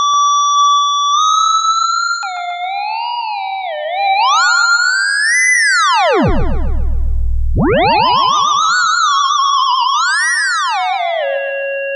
Added some echo.
sound, sample, theremin, mousing